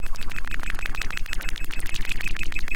Wet Blipper
Blippy electro water sound.
bend, bending, bent, circuit, circuitry, glitch, idm, noise, sleep-drone, squeaky, strange, tweak